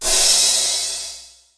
Crash cymbal with echo effects.
drum, cymbal, sample, percussion, free, crash